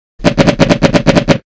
This is a sound effect I made by Popping a balloon which I then edited to sound like a machine gun firing.
Gun, Bangs, Gunshots, Warzone, Shooting